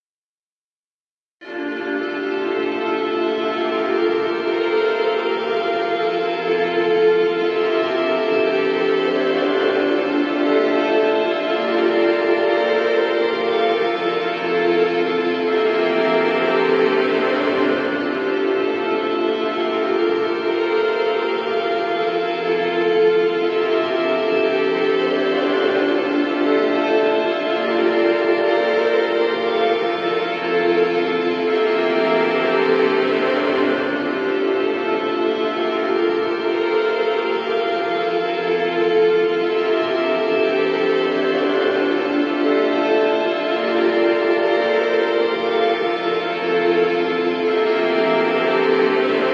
layers of guitar looped with boss rc20-xl, reversed, then recorded with akg c414 into ART tube fire audio interface, into logic pro, stereo imaged. slight eq'ing.
loop, epic, guitar, loopstation, layers